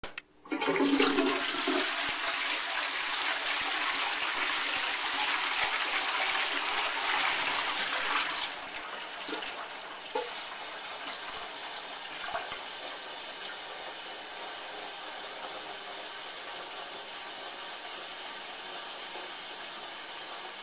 Toilet flushing and tank refilling.
flush, toilet